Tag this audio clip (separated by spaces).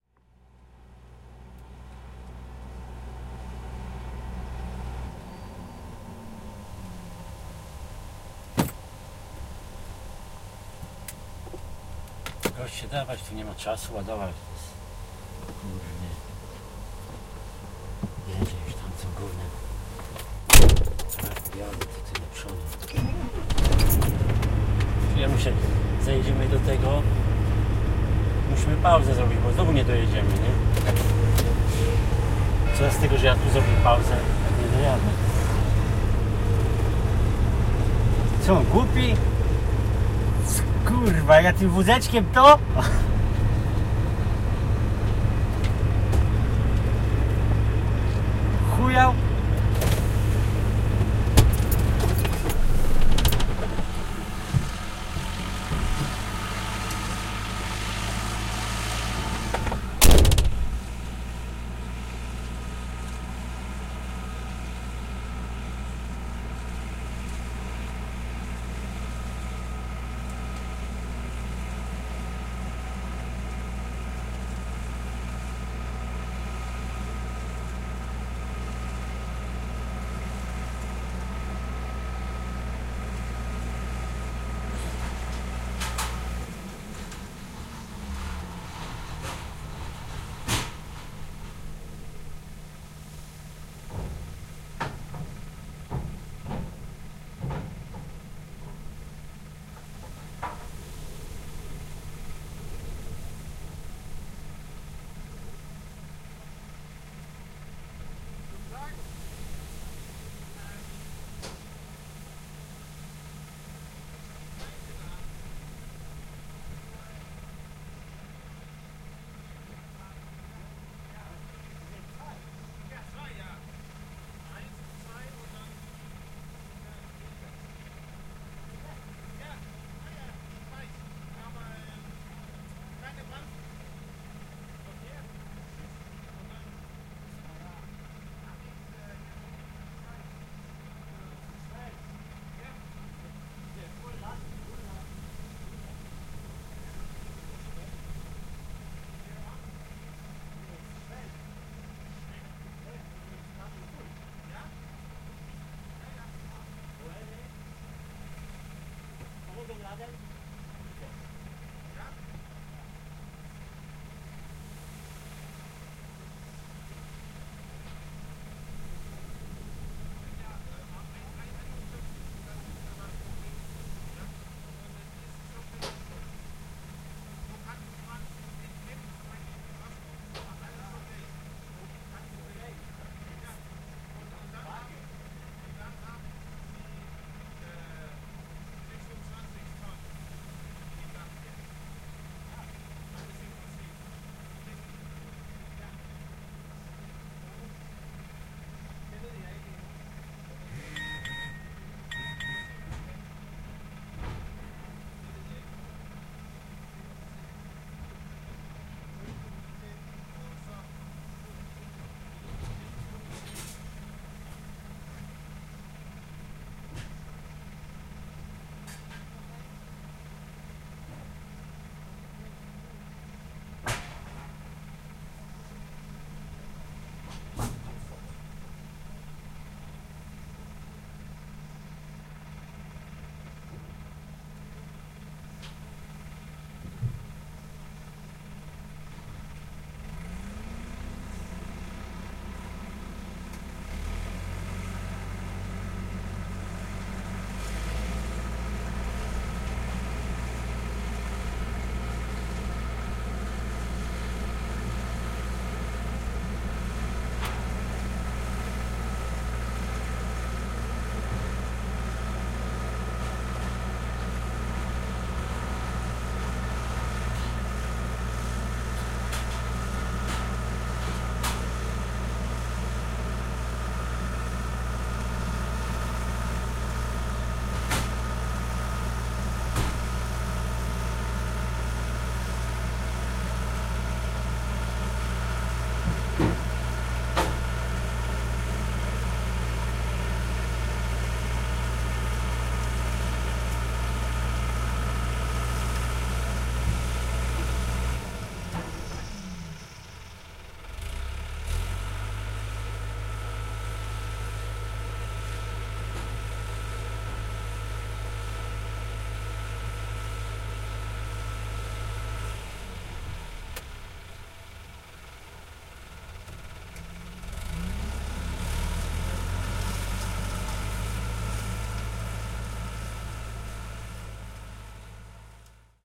voice wind field field-recording loading load noise truck clicks birds kolding denmark buzz engine forklift trator